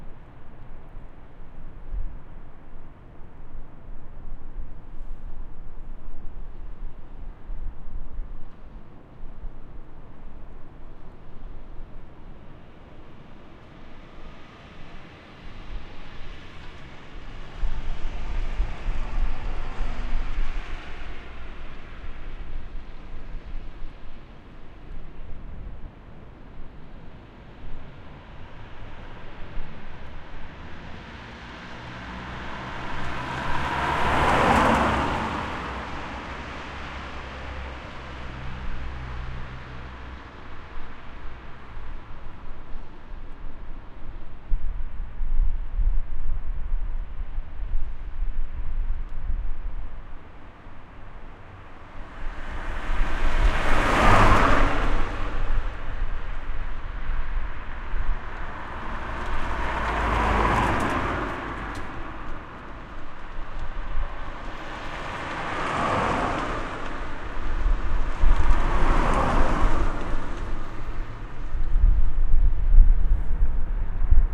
Street at night
Ambience of a street in Versailles at night, with cars passing by.
{"fr":"Rue la nuit","desc":"Ambiance d'une rue versaillaise la nuit, avec des voitures qui passent.","tags":"rue nuit voiture versailles"}
car, night, street, versailles